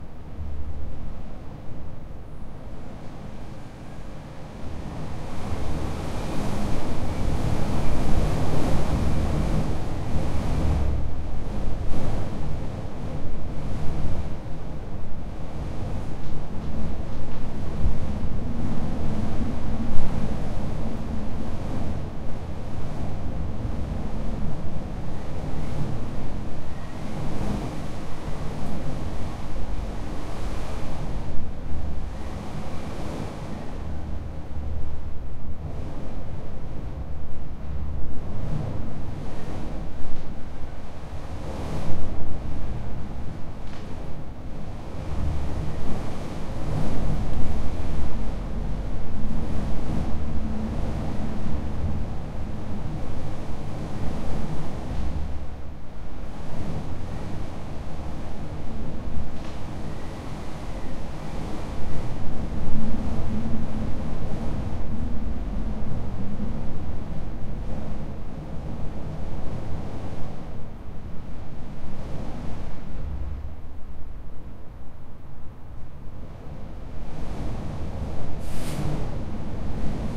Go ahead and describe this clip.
Wind blowing gusting through french castle tower
High winds blowing through a turret at the top of a castle.
Recorded from the top turret of the Citadel at Sisteron France
air, Astbury, blow, blowing, blowing-wind, breeze, castle-turret-wind, draft, France, gale, gust, gusts, howling, mistral, nature, storm, strong, trees, wafting, weather, wind, windy, zephyr